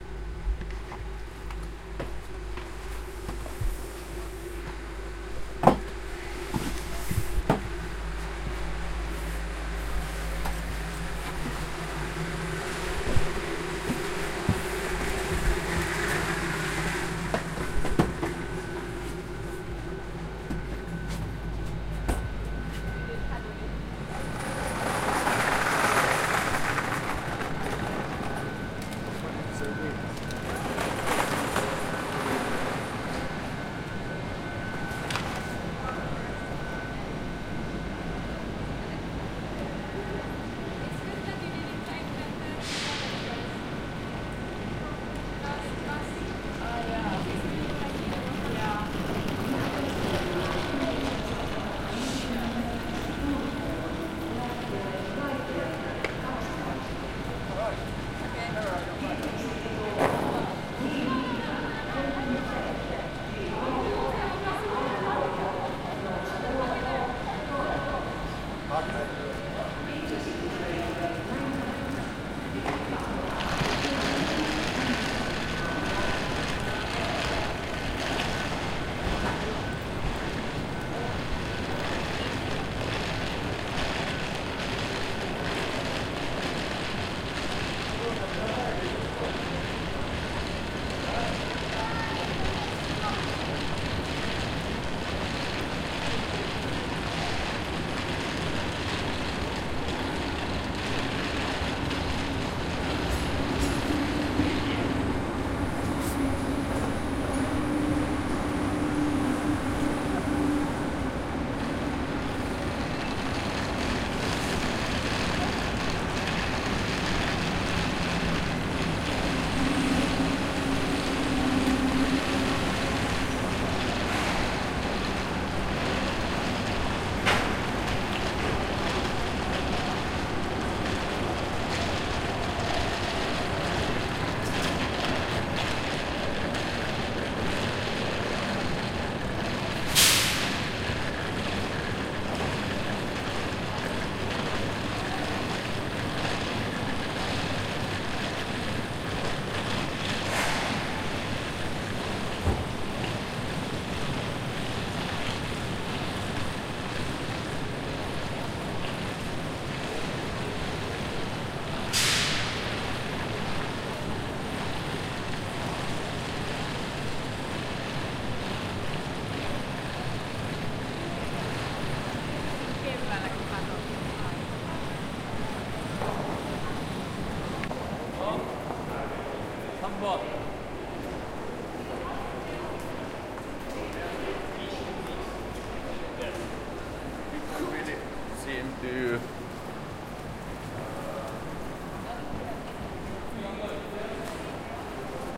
Arriving at Helsinki railway station, relieved chatter and trolleys.
Train arriving to Helsinki. People get out of the wagon and head to the station. Recorded with Tascam DR-40.